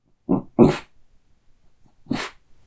Dog Calming Down 1
Jack Russell Dog trying to bite something.
woof, biting, dog, bite, barking, fight, Jack-russell, attacking, animal, woofing, fighting, attack, lurching